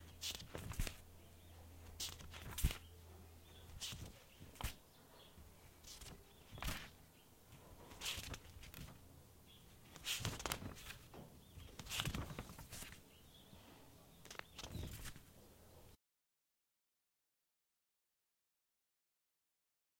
page flip in notebook
a page being flipped in a notebook
film, notebook, OWI, page-flip